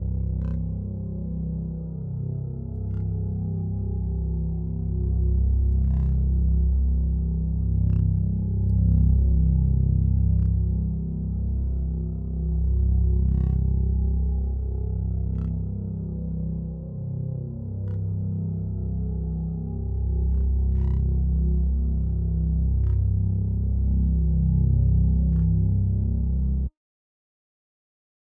a bass drone wich I made with serum and a bunch of effects